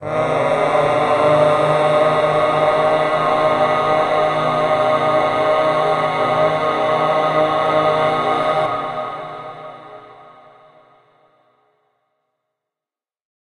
choir multicdrk 001
A multiple recording of my voice singing something like "aaaaaah" on which I added some reverb.
reverb, voice, male, choir